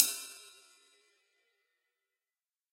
Ottaviano22TurkRideCymbal2705gBow
Ottaviano ride cymbal sampled using stereo PZM overhead mics. The bow and wash samples are meant to be layered to provide different velocity strokes.
stereo, drums, cymbal